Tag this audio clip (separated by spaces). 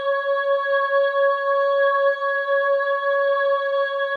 female loopable reverb singing vocal woman